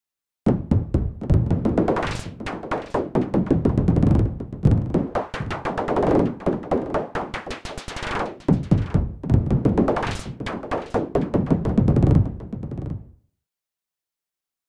A synthesized hard bouncing ball – I know I know it’s been done before [Aphex geezer] and you will think he did it better but remember – “all comparisons are odious” – Buddha said that. “Aw sheeeiiiittttt!” – I said that. Part of my Electronica sample pack.